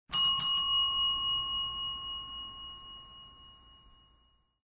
A sound of a restaurant bell being tapped twice.